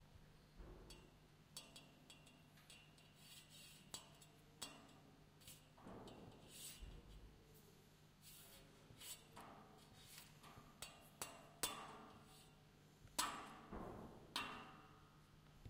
Hitting metal off another piece of metal
scrape, hit, impact